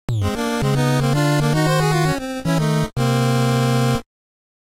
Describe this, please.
8-bit Game Over Sound/Tune
This is a sound made in Famitracker that could be used to indicate a game over.
8-bit, classic, fail, game, lose, over, retro